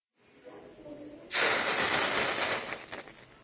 Lava death sound for game developement
burned, out, game, player, kill, burn